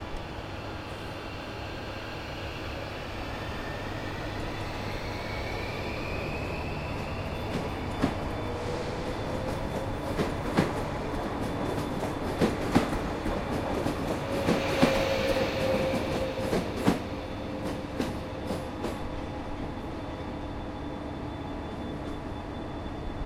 zagreb Train Leaving
Zoom H1 Zagreb Train station morning commuter trains